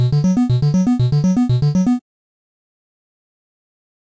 8 bit arpeggio 001 minor 120 bpm triangle 012 B1
loops, loop, 8, music